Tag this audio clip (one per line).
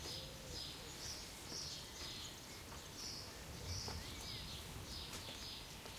birds
forest
silence
wind